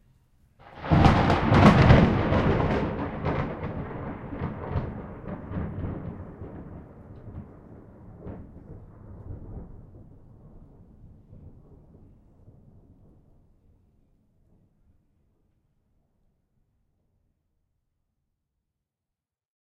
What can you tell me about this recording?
A close, loud lightning strike.
Recorded with a Zoom H1.